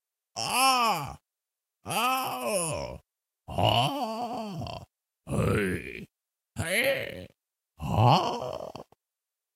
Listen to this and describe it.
A man who wants to teach you the old skill of using a sword... If only he could find one...